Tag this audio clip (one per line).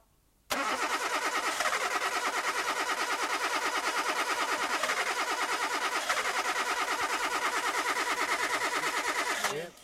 start
starter